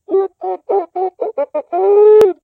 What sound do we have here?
I dont'n know what it can be, maybe monster of little dino?
cheep, dino, dinosaur, female, monster, squawk